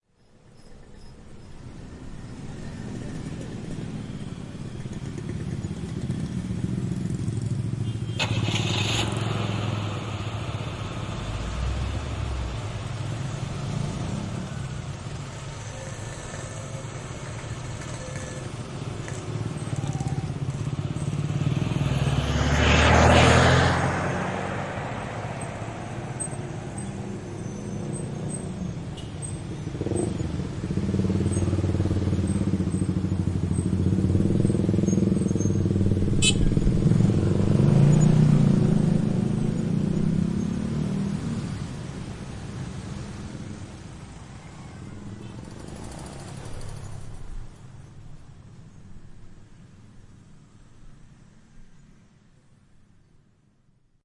Bunyi no.4 mobil start dan ambient
Car, Engine